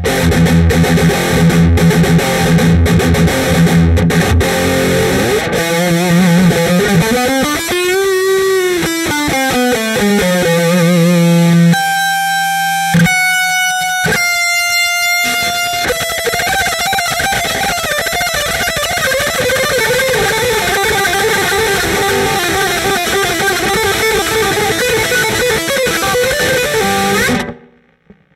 Electric stratoclone through behringer MIC200 tube preamp into Zoom Player 3000 through generic sound card to disk. Some crunchies, some lead and some sloppyness at the end. Sound improved significantly.
guitar, mic200, electric, sound, test